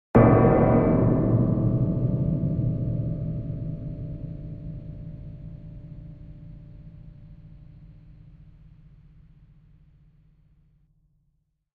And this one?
Old Metal
This is the sound of a metal sculpture struck and recorded...sorry about the dent! :) Recording chain: Edirol R09HR - Sound Professionals SP-TFB-2 microphones.
bang, binaural, bong, gong, harmonics, metalic, overtones, struck